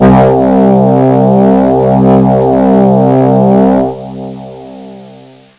34 synth-strings tone sampled from casio magical light synthesizer